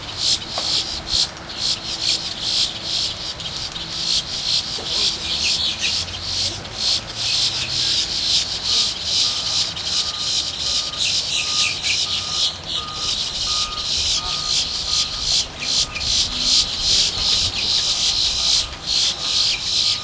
Birds going crazy at the parking lot of a busy store while Christmas shopping.